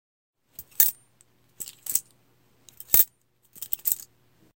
The sound of someone opening and closing a butterfly knife
butterfly-knife, metal